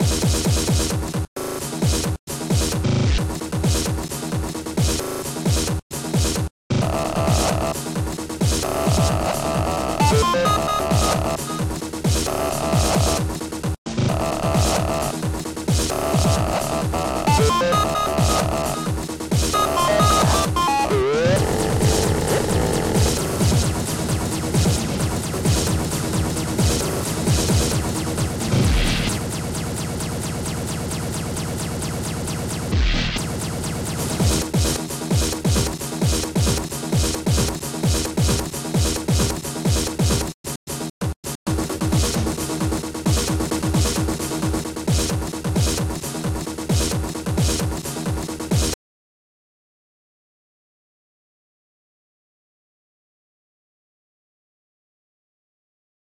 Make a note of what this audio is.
001 Fuck the floor(Glitched CD) it
A sequence of percussive cd skipping noises made in to a techno glitchcore rhythm. this is a hard rhythm not micro-beats. there is a splash of synth in there and possibly a key change.
experimental, full, glitch, hard, sequence, skipping-cd, techno, weird